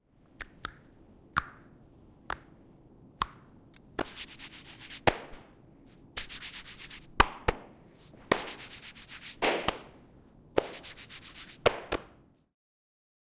Snap Clap Rumble
cool, lit